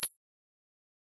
Gold Pick
Sound for picking up a coin. Was recorded by sliding two coins in front of a Zoom H2n recorder. I used ReaFir to equalize the sound.
Collect, Collectable, Game, Gold, Interaction, Pick, Pick-Up